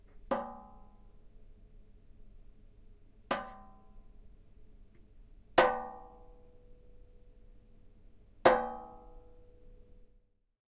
Metal sound 8 (cabinet)
A metal cabinet being flicked with a finger. Ouch.
foley, flicking, soundfx, sound, metalfx, Metal, metal-sound